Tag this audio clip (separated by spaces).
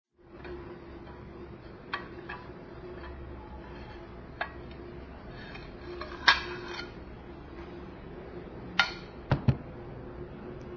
Audio Sonido platos